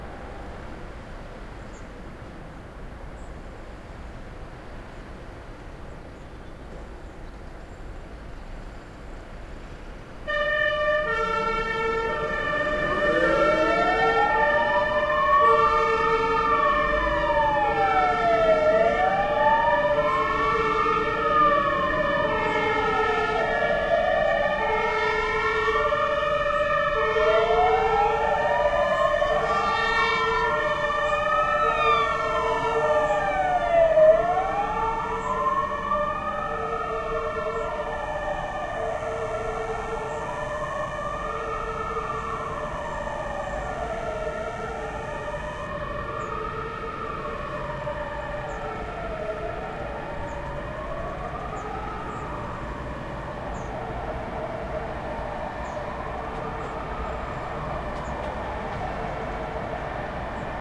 Fire truck siren. Alicante - Spain
This sound was recorded halfway up to the castle Santa Barbara in the city of Alicante.
Signal that carries a connotation of alert. His tone and timbre are characteristic of this element. "Possessed by almost all modern cities and whose use is reserved for that fateful day when the sound will be followed by the disaster." (Schafer, 2013)
Recorded by a Zoom H5 recorder